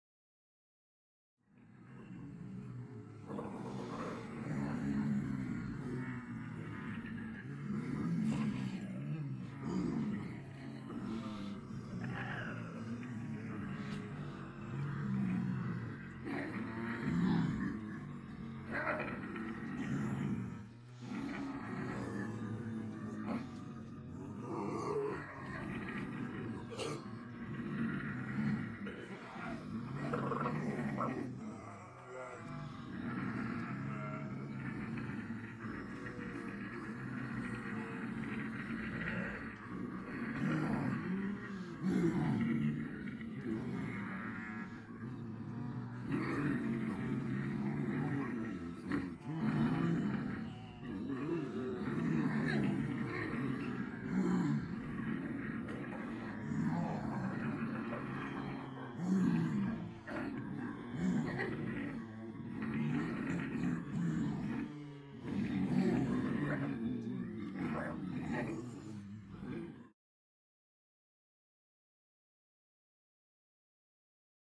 Multiple people pretending to be zombies, uneffected.